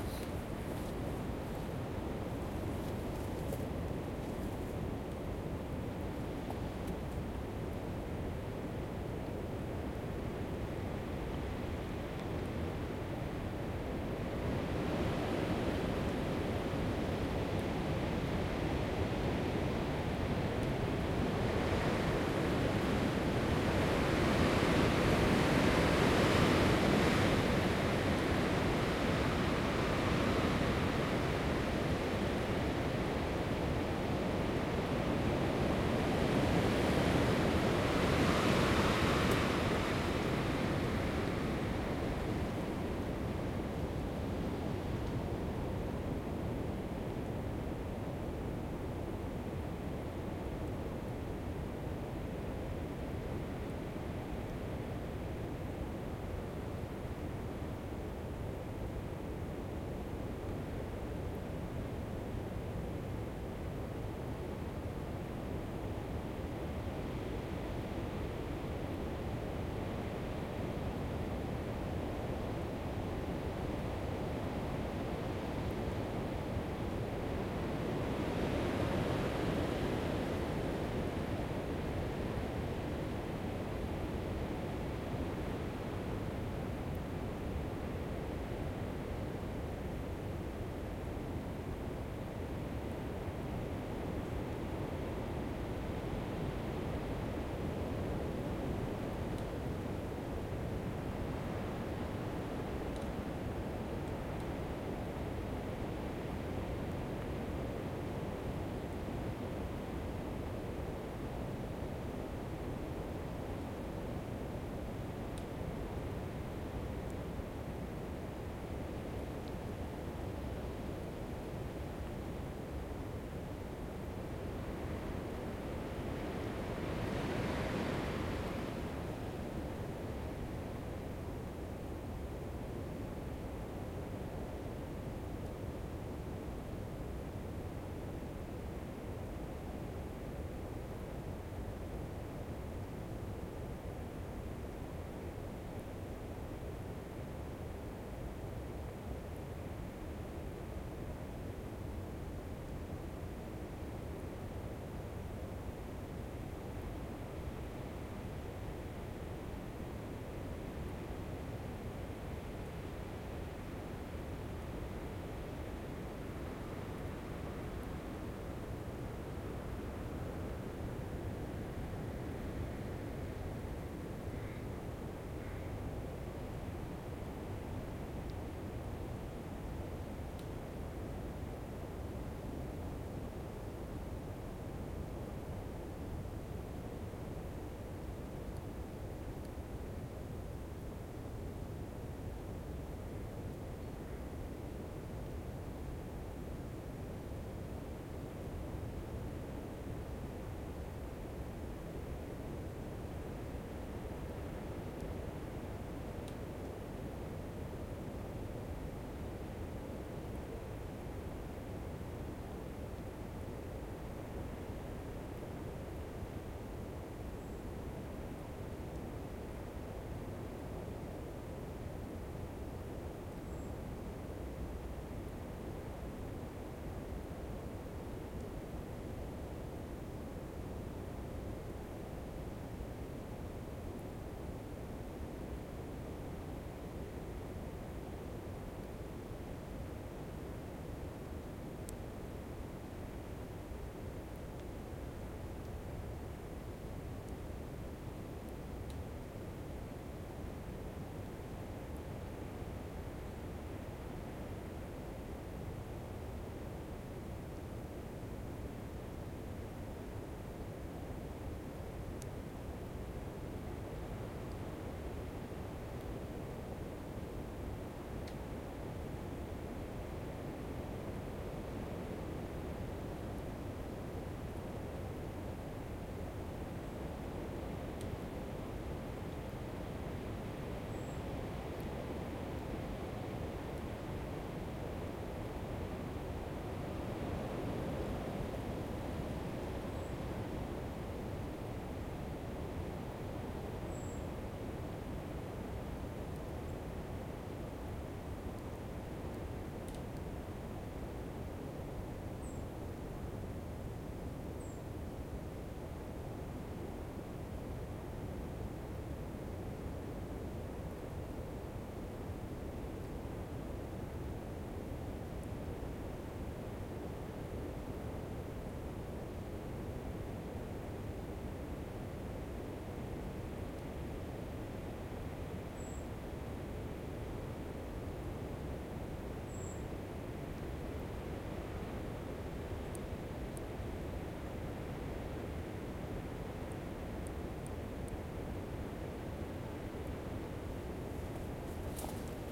Location Windy Forest
some wind i recorded in a local woods. the trees were bare.